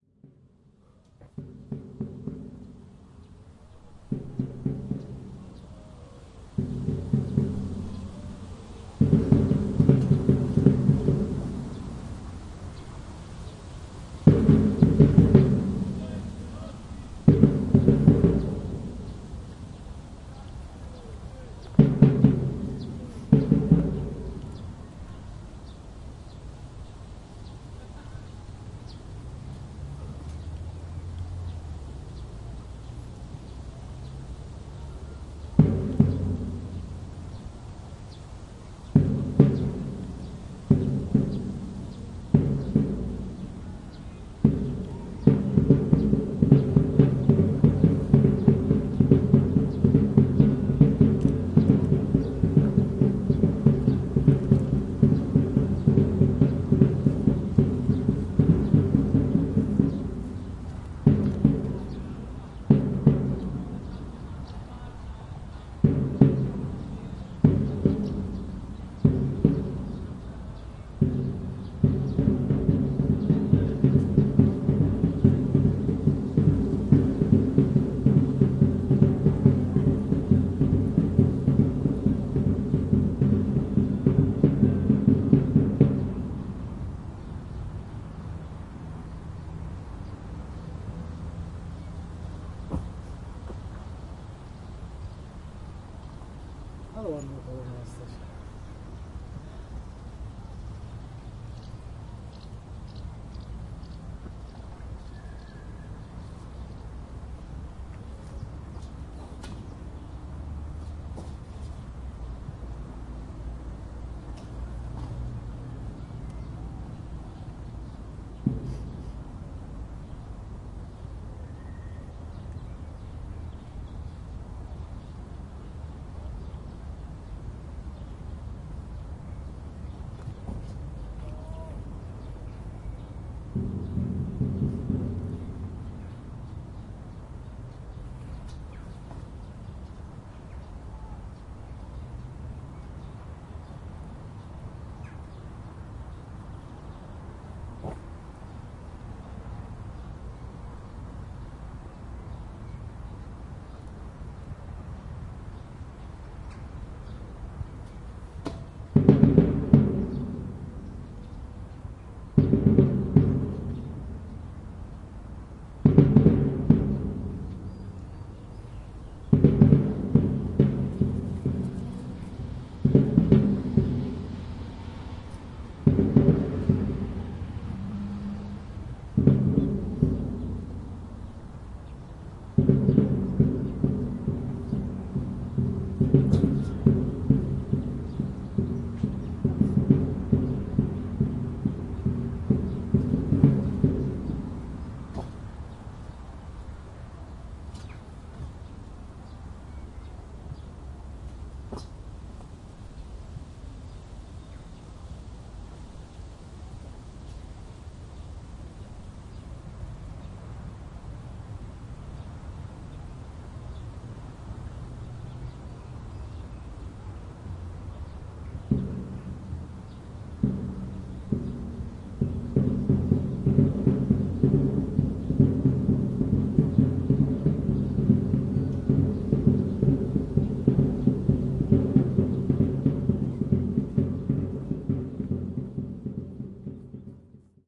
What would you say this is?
30.05.2011: about 19.30. Chwialkowskiego street.the Warta football team supporters trial. the sound of drumming. Poznan in Poland.